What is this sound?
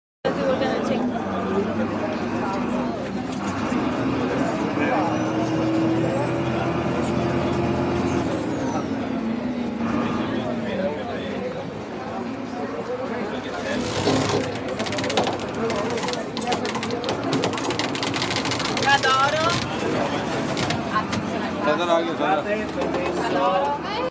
Rope3 good
rope tightening venice italy
tightening, venice